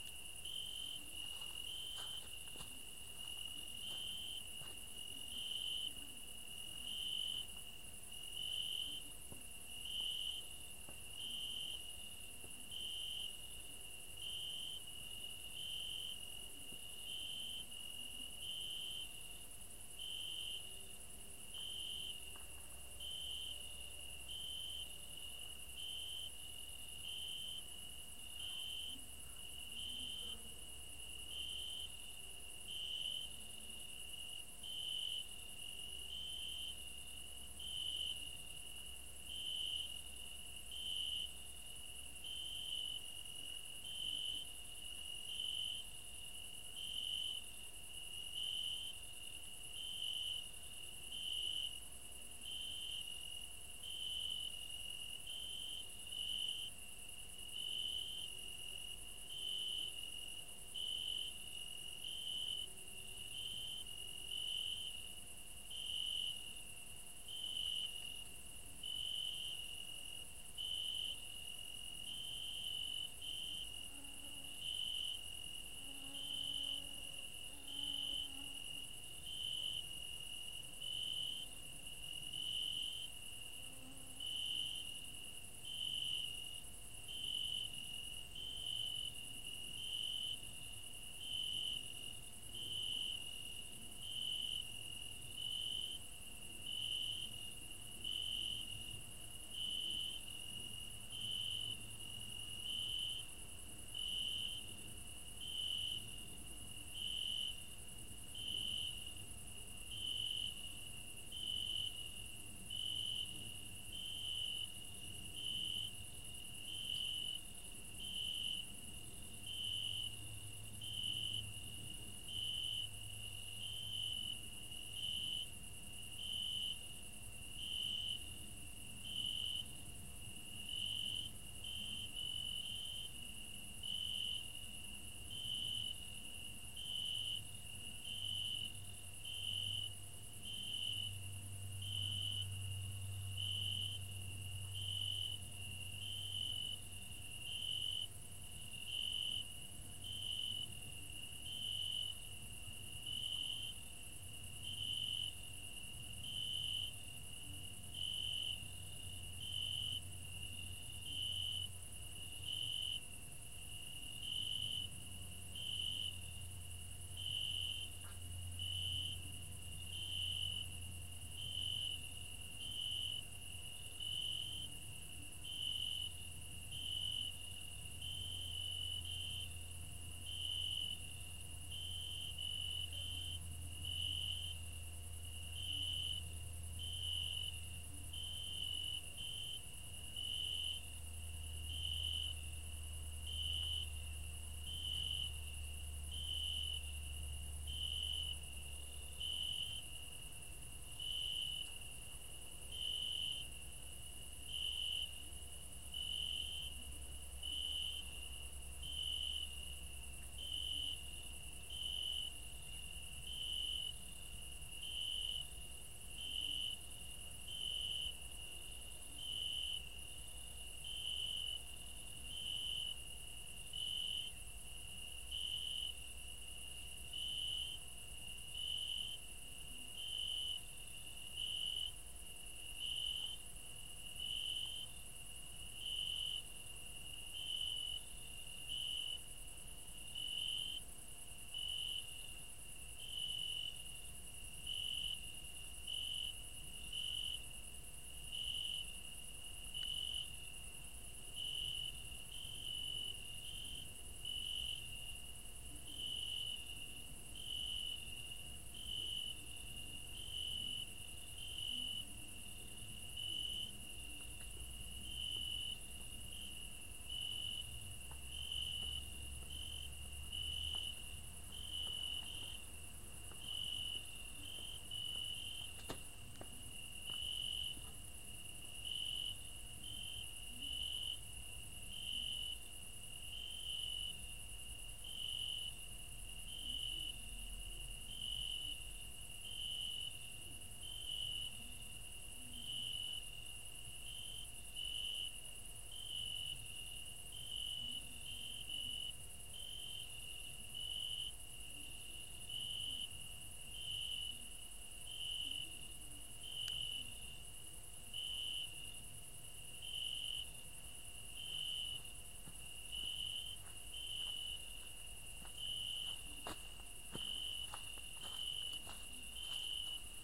After sunset I hanged my MP3 player on the branch of a tree and recorded the ambiance. File recorded in Kulcs (village near Dunaújváros), Hungary.
ambient, cricket, field-recording, horror, nature, night, thriller